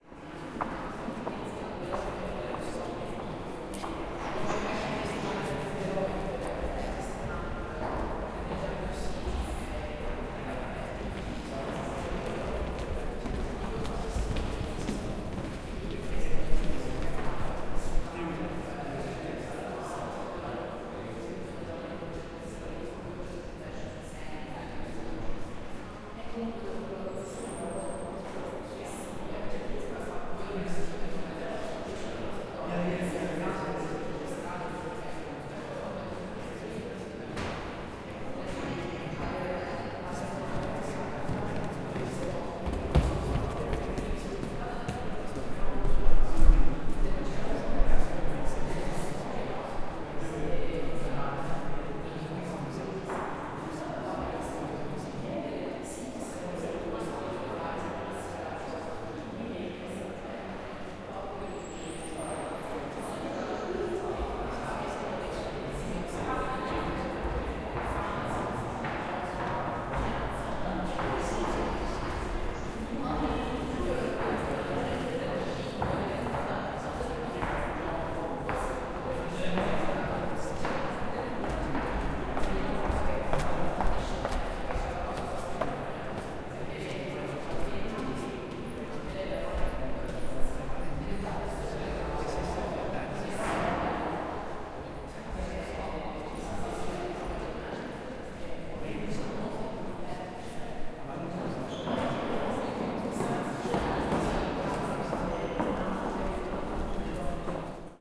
UCL Flaxman Gallery
Field recording in the refurbished Flaxman Gallery. Recorded 29 November, 2012 in stereo on Zoom H4N.